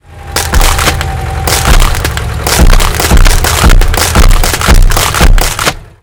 This is a sound described in the "Dr. Discord" scene of "The Phantom Tollbooth" by Norton Juster: "square wheeled steamroller ride over a street full of hard-boiled eggs". I needed it for a play so I created this sound.
Sounds I used to create it:
discord, doctor, dr, phantom, square, steamroller, tollbooth, wheeled